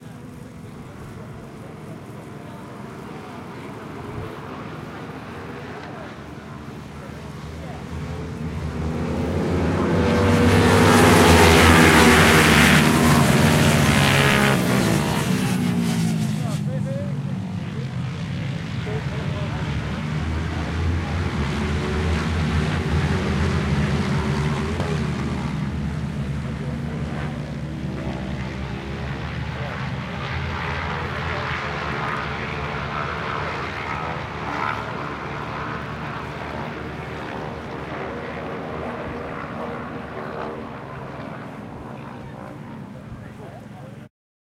Motorbike Race - Loud Ride By 02
Recorded at trackside on a Zoom H4N at the Anglesey Race Circuit, North Wales.
Atmosphere, Bikes, Drive, Engine, Field-Recording, Motorbikes, Noise, Outdoors, Race, Racing, Speed, Sport